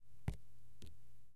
Drops on paper.